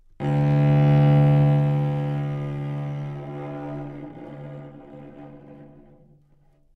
Cello - D2 - bad-richness
Part of the Good-sounds dataset of monophonic instrumental sounds.
instrument::cello
note::D
octave::2
midi note::26
good-sounds-id::4504
Intentionally played as an example of bad-richness
multisample, neumann-U87, cello, good-sounds, D2, single-note